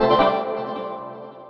click sfx6
This is a pack of effects for user-interaction such as selection or clicks. It has a sci-fi/electronic theme.
click, effects, feedback, fx, icon, interaction, response, select